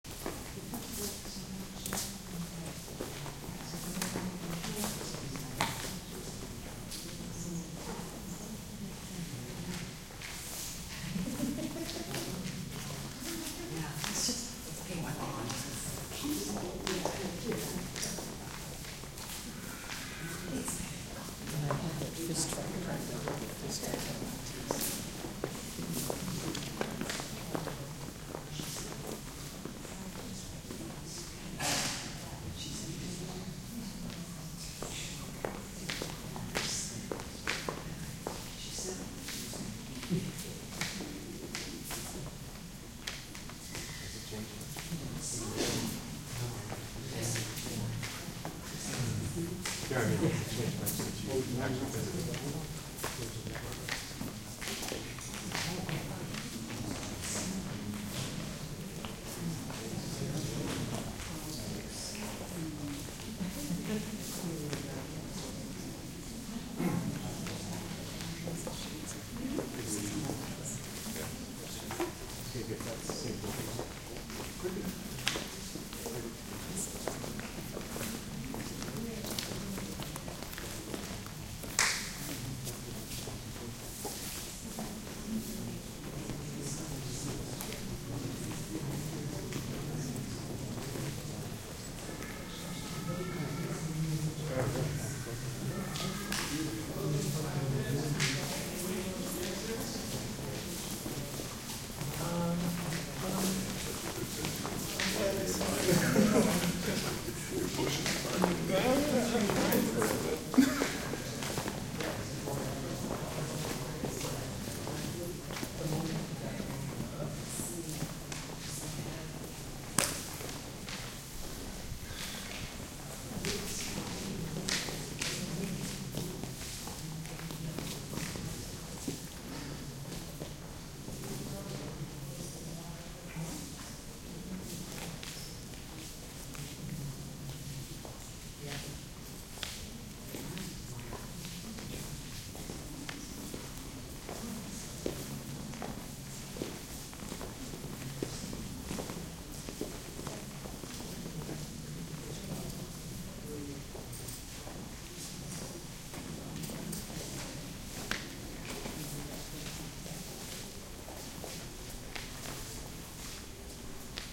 crowd int light whisper murmur hush medium room museum steps movement NYC, USA
int, steps, crowd, murmur, movement, whisper, USA, museum, NYC, light, hush, room, medium